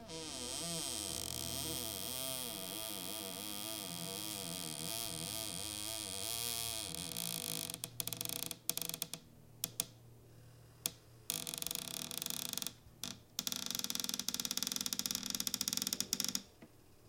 cupboard creak 2
A very long, drawn out creak. Perhaps slower than "cupboard creak 1" and with some different tones too.
creak, cupboard, door, hinge, kitchen, squeak